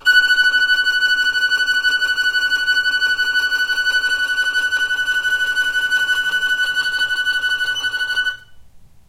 violin tremolo F#5
tremolo, violin